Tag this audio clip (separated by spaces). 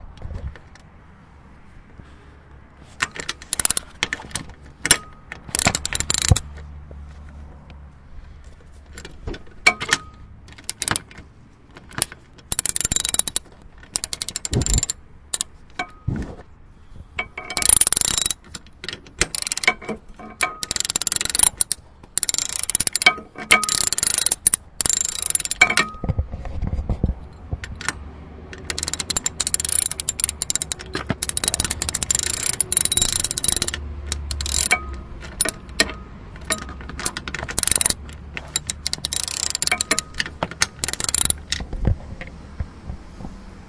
car field-recording mechanic ratchet tools